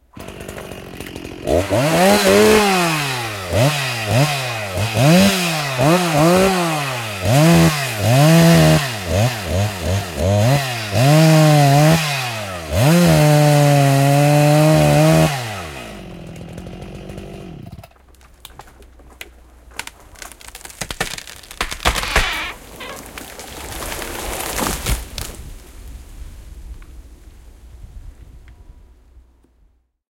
Moottorisaha, puu kaatuu / A chain saw, big tree falls, timber, roots crackle
Sahausta moottorisahalla, puu kaatuu, juuret raksahtelevat, iso puu kaatuu
Paikka/Place: Suomi / Finland / Vihti / Haapakylä
Aika/Date: 01.10.1985